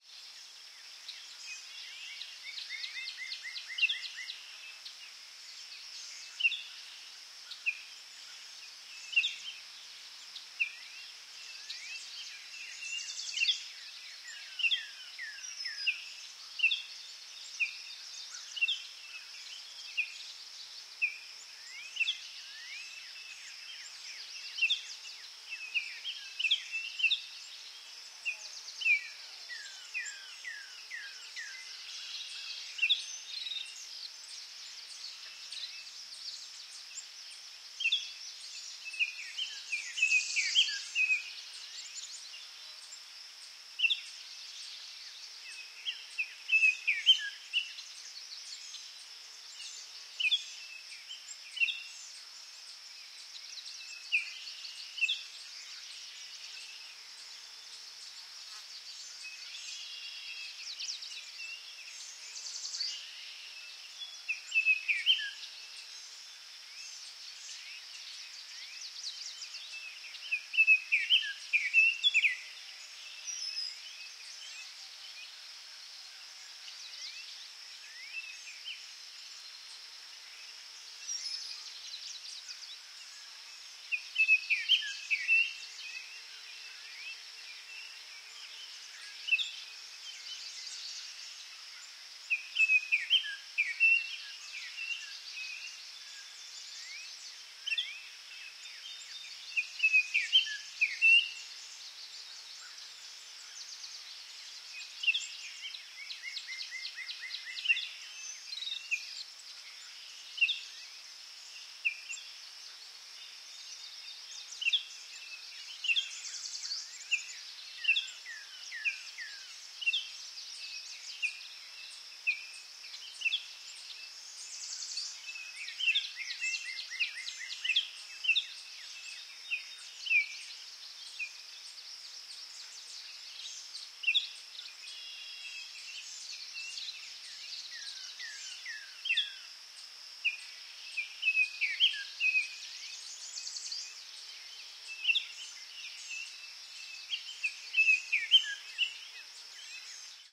Forest Trees Leaves Nature Field-recording Wind Summer Day